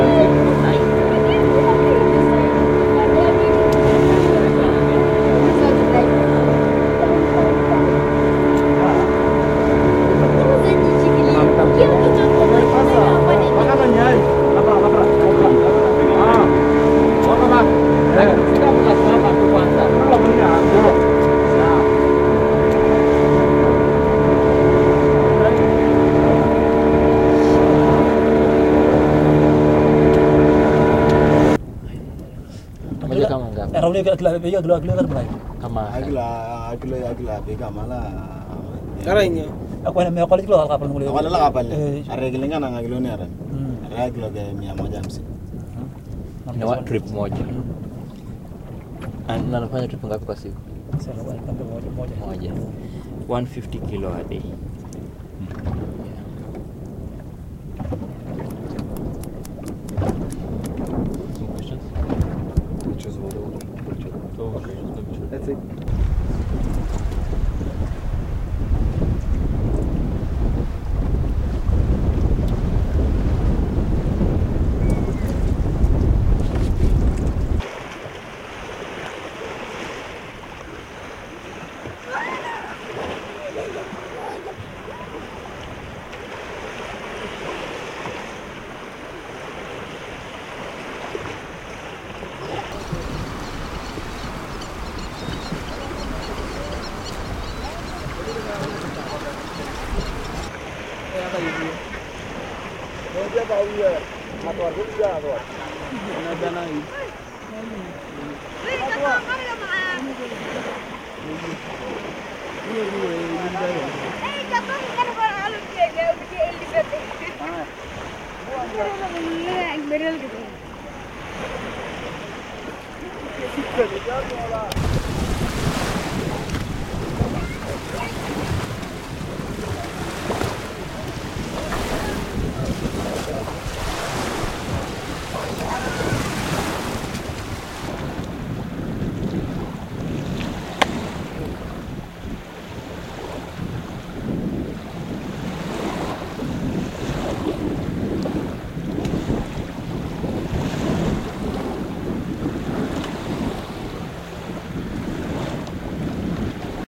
dec2016 fishermen Kenya Turkana Todonyang
ambients, fishermen near Turkana lake, Kenya, Africa
Fishermen, Kenya, Turkana